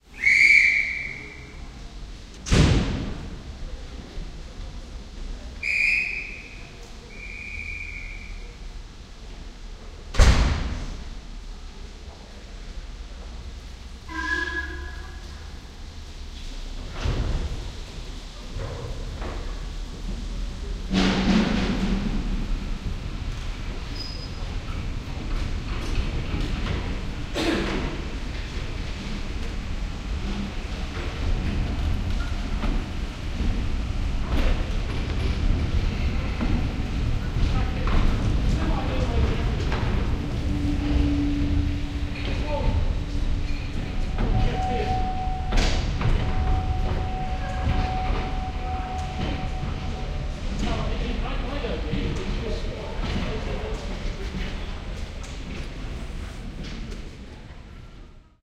Steam Train, pulling out of Pickering Station, UK (different one to Steam Train 1)
Recorded binaurally, using a home-made set made from Primo EM172 capsules into a Zoom H2.n recorder.
More information here: